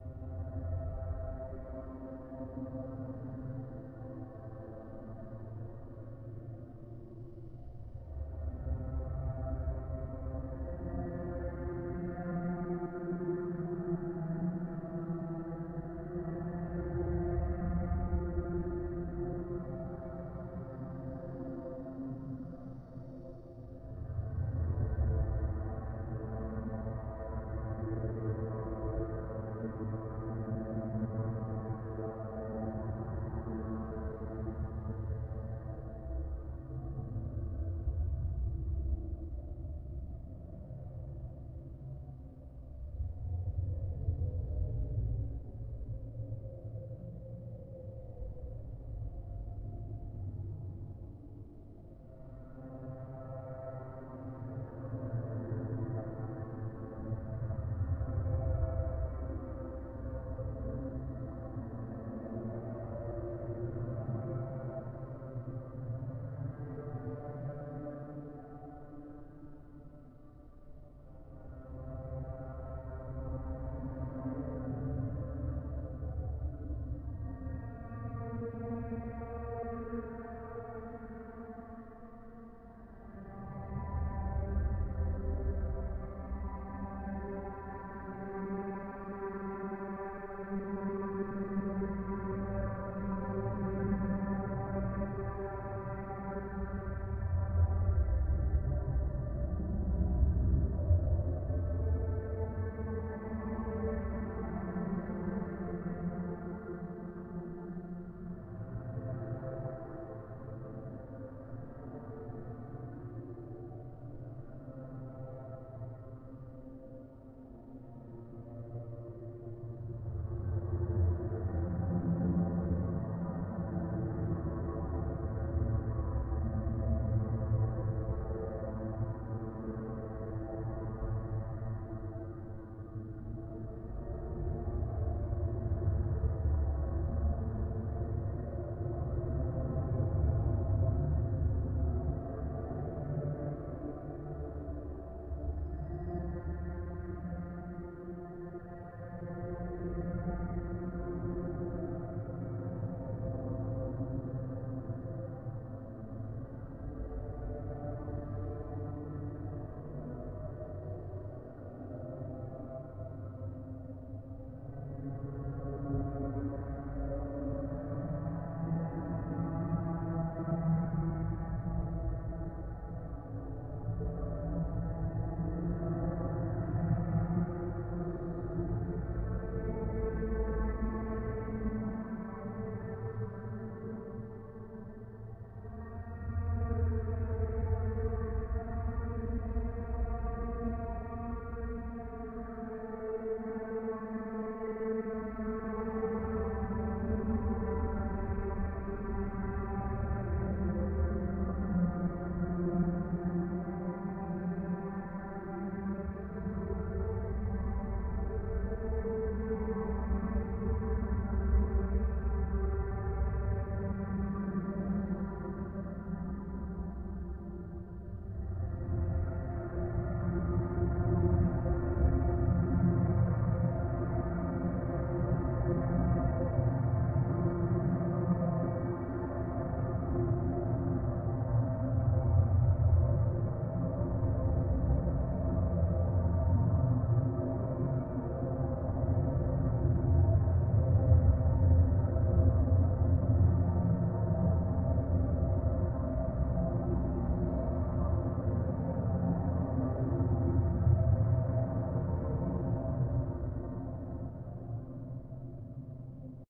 Church Drone
Edited from Orchestral Music.
drone, music, atmosphere